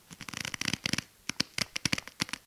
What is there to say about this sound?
Creepy Shoe Sound
creepy, shoe